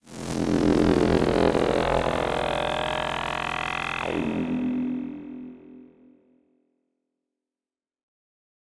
space engine
effect, fx, synth